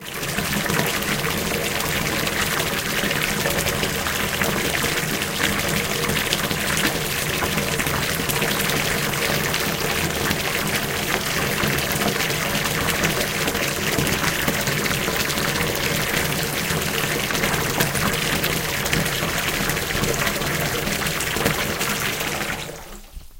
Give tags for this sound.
filling warm fill bath water bathroom tap

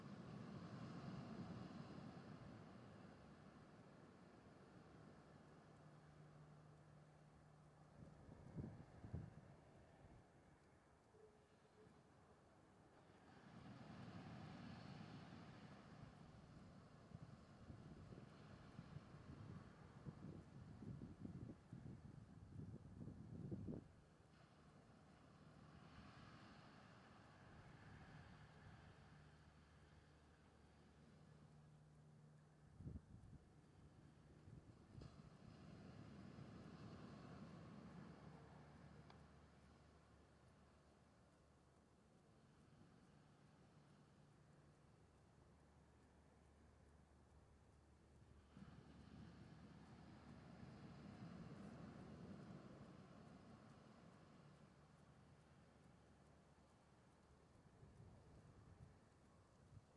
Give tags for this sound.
ocean beach sea

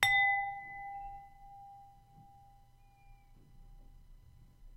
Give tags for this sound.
ambient Bell chime Ding Gong Ping Ring Ting

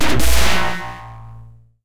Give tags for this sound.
dark distorted distortion drone experimental noise perc sfx

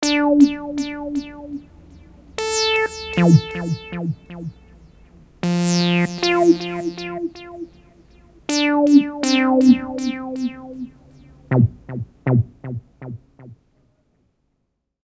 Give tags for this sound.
electric sound kaossilator2

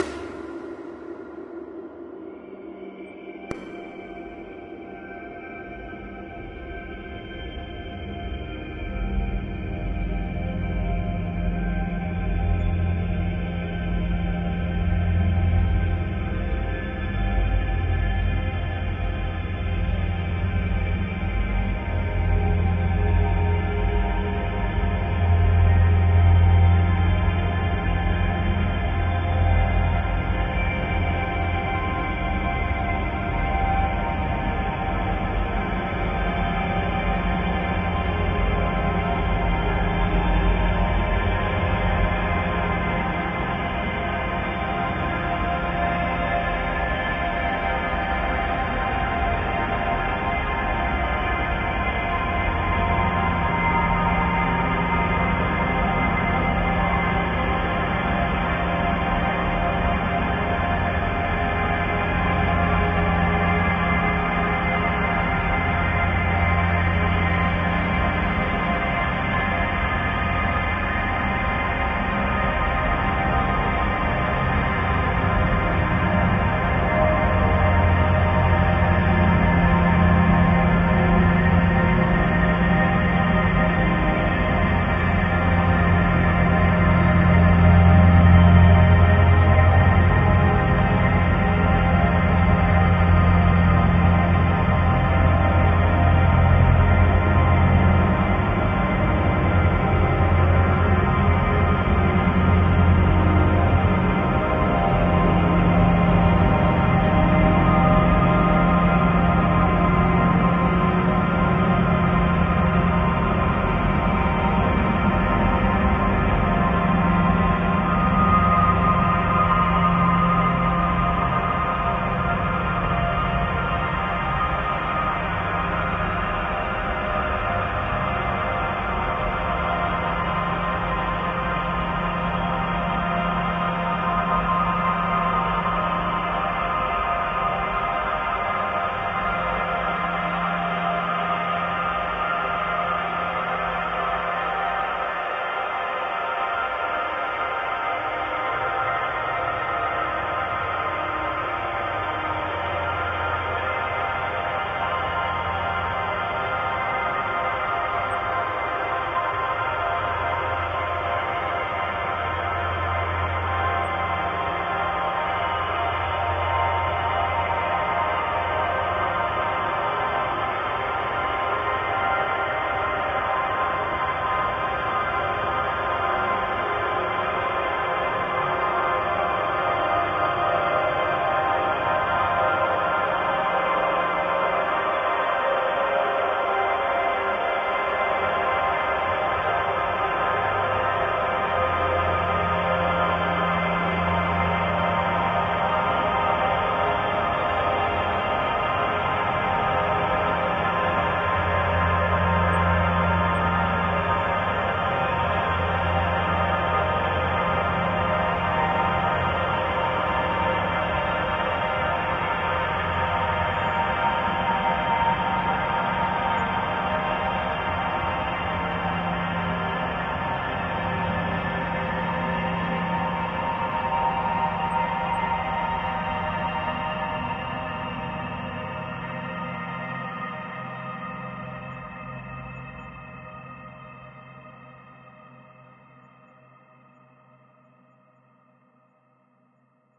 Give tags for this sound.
ambient artificial divine dreamy drone evolving multisample pad smooth soundscape

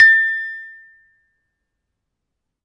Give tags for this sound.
gamelan hit metal metallic metallophone percussion percussive

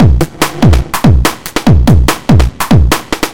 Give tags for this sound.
140 BPM Hardbass Hardstyle Loops

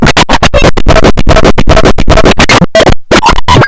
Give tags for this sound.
bending circuit-bent coleco core experimental glitch just-plain-mental murderbreak rythmic-distortion

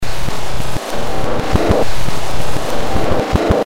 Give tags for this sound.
2-bar ambient dark electronic industrial loop noise pad processed rhythmic sound-design

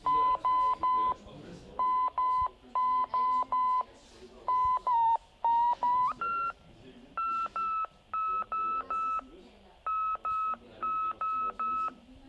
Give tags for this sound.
amateur-radio broadcasting field-recording transmission

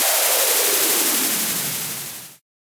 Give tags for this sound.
weird strange rancid synth noise processed extraneous

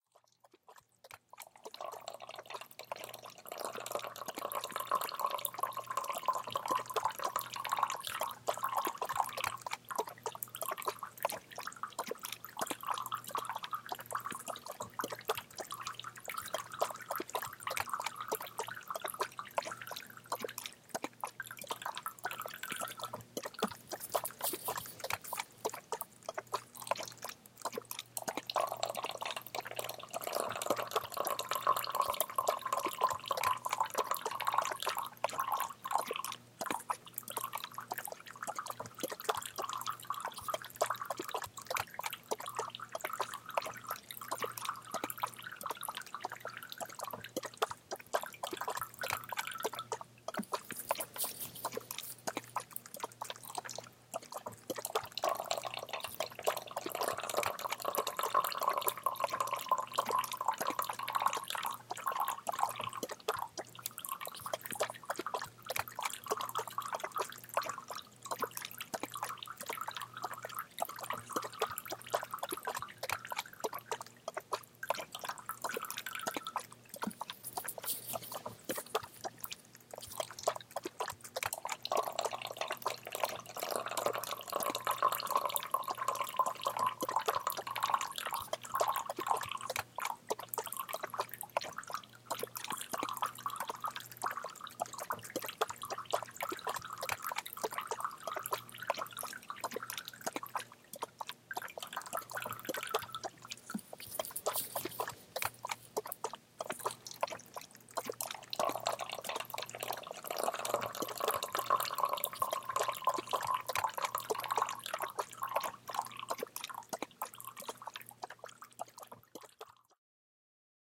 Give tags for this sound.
bubble submerged underwater

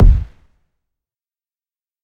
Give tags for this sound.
drum experimental hits idm kit noise samples sounds techno

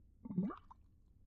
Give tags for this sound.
bubble bubbles bubbling liquid water

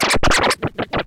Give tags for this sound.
dj hip-hop loop rap scratch turntable vinyl

